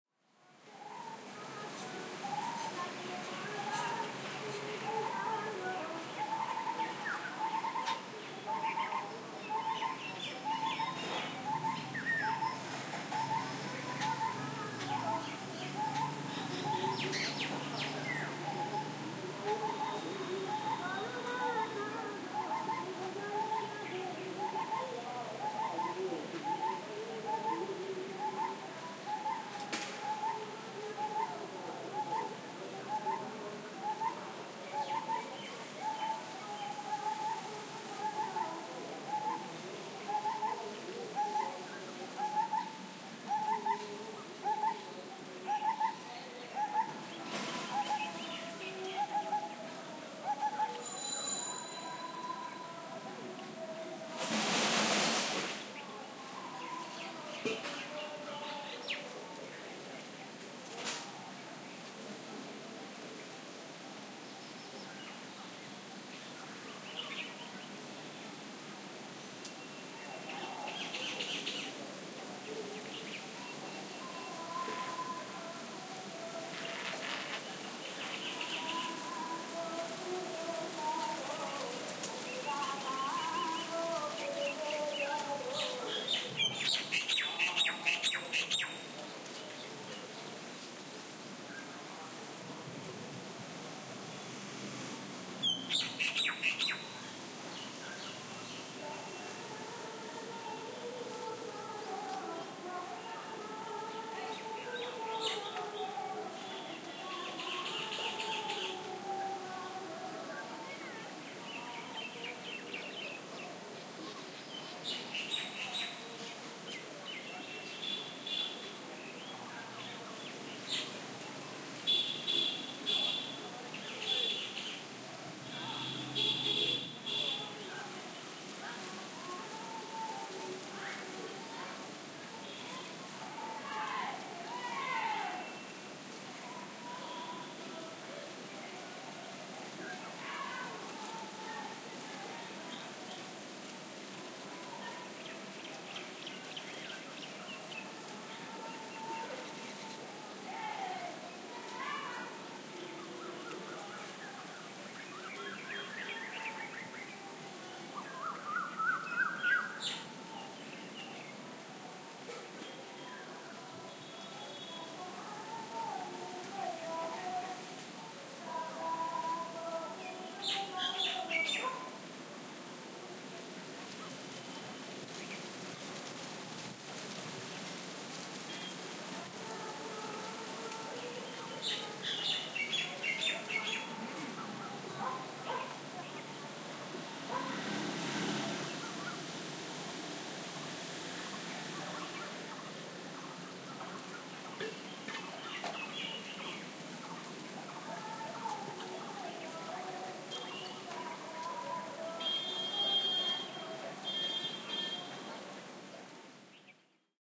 Rural, Terrace, afternoon ambience, light wind, leaves rustle, birds with distant loudspeaker
An afternoon recording in a small rural place in Kolkata, India. Some loudspeaker song was heard from a distance.
Recorded with Zoom H5
ambiance, ambience, birds, field-recording, india, leaves, nature, rural